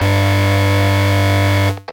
Subosc+Saro 001
This sound is a processed monotron beep.
The headphones output from the monotron was fed into the mic input on my laptop soundcard. The sound was frequency split with the lower frequencies triggering a Tracker (free VST effect from mda @ smartelectronix, tuned as a suboscillator).
The higher frequencies were fed to Saro (a free VST amp sim by antti @ smartelectronix).
For some reason, when I listen to this sound I get an 'aftertaste' in my ears! Sounds like a 'fsssssss' sound that persists for a second or two after this sound has finished.
antti, beep, electronic, korg, mda, monotron-duo, saro, smartelectronix